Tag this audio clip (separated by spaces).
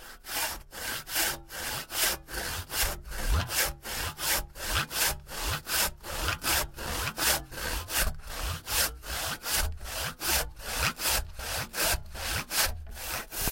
CZ Czech Panska saw